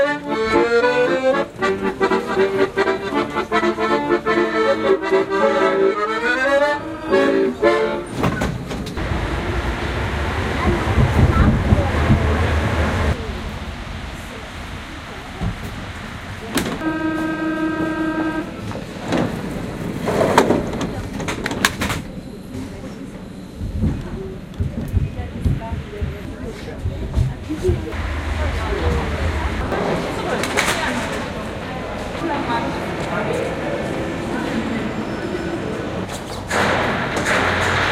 Paris Commuter Train, outside and inside 3
City of Paris, Commuter Train, from outside and inside (no. 3). A street musician plays last notes before the train departs.
Commuter-Train, doors-closing, metro, Paris